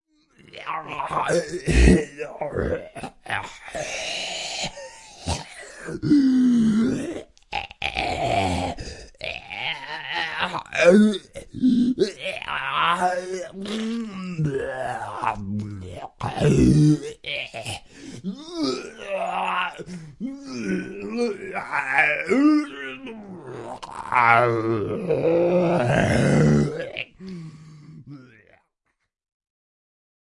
A sample of a moaning zombie for use in other projects
moaning, sample, Zombie